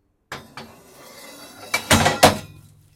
Metal bar swipe and hit
Two metal bars sliding along each other then falling, hitting metal bin